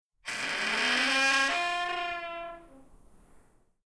Opening Door squeak
close, creak, creaky, door, handle, old, open, rusty, squeak, squeaky, wood, wooden